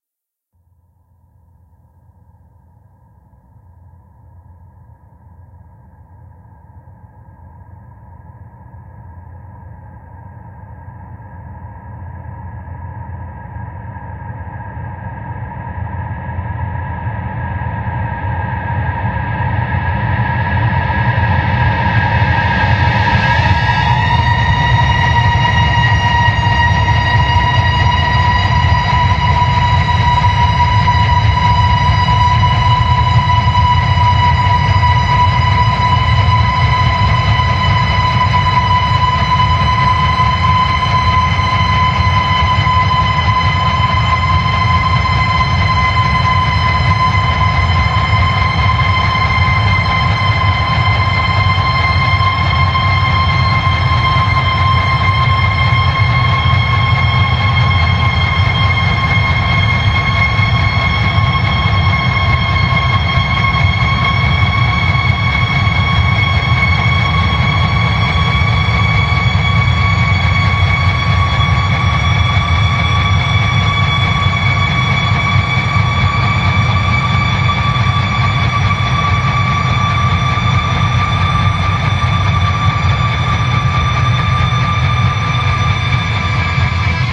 rise of the guitar- B a R K M a T T E R
This sound fx came from an ambient section of a song I was working on. Its an electric guitar played with a slide to create a constant type of sound, then sent through a huge reverb and then the whole thing is reversed. Came out really well I think. I hope somebody uses it in a film.
B a R K M a T T E R OUT/